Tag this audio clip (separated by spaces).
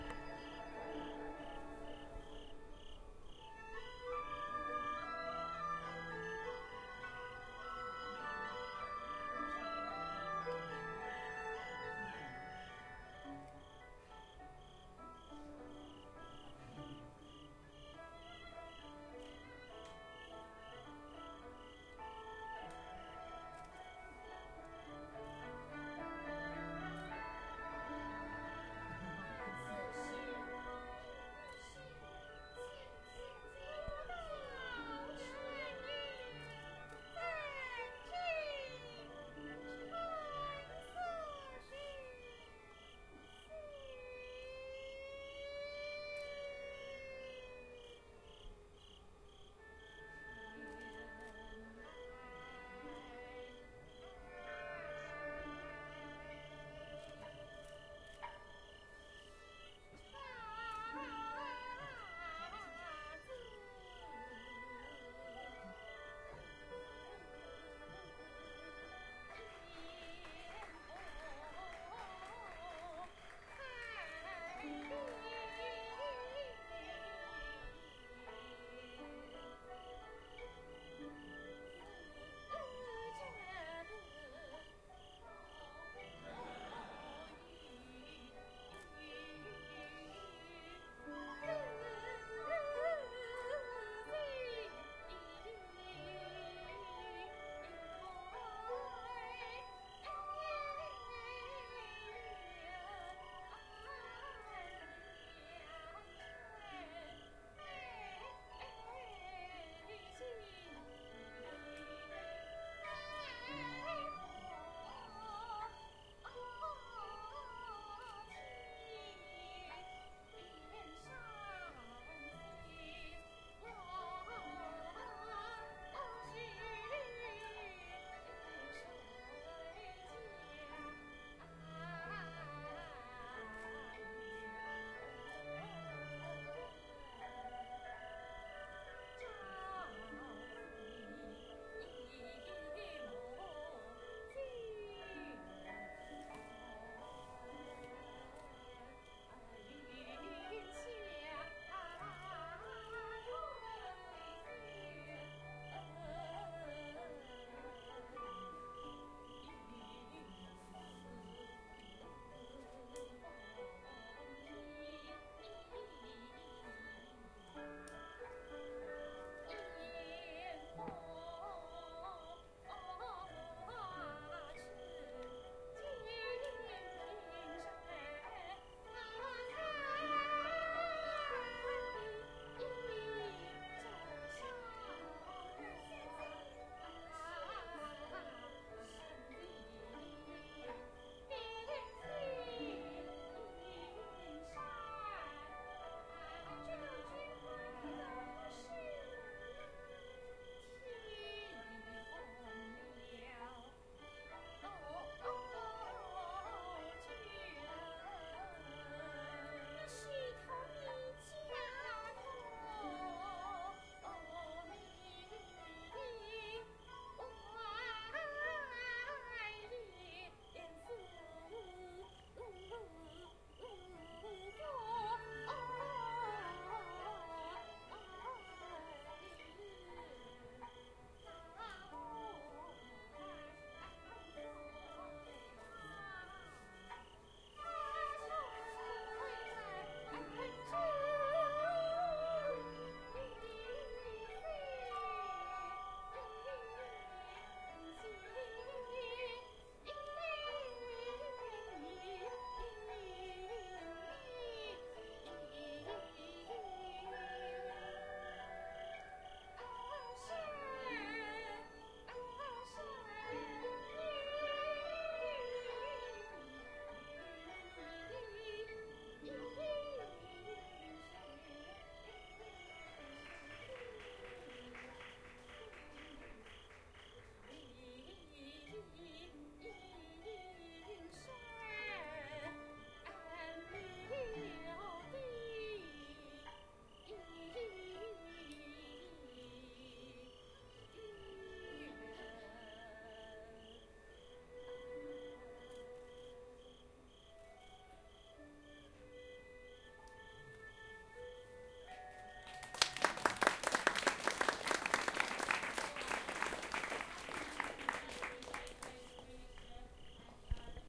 traditional vocal concert voice singing live music